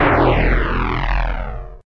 BASS SYNTH PHASE STAB IN E 01
A bass synthesizer stab with phasing/chorus. Quite an aggressive sound, might work for a bassline or something.
bass, key-of-e, oscillator, phasing, stab, sync, synth